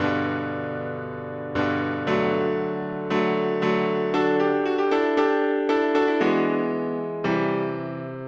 On Rd piano loop 2
Was in a pile of older stuff about to be deleted! Can be applied to various styles.
Slight mixing applied. An untouched download is should also be available.
16-bars, piano, On-Road, chords